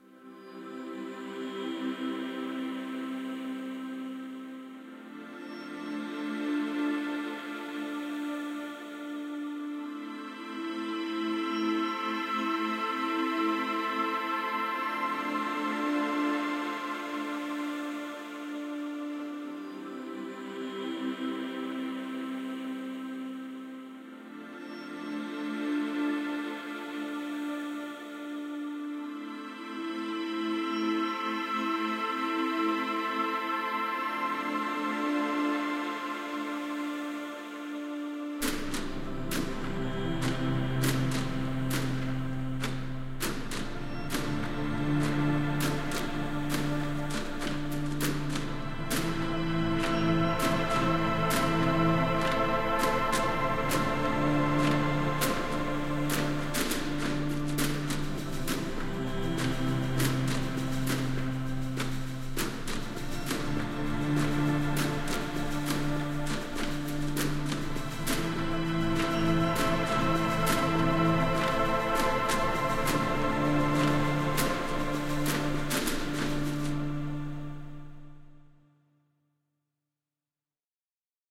PADS & CINEMATIC PERCUSSIONS
Tools of Choice: Omnisphere & Damage. 100BPM
pad, soundscape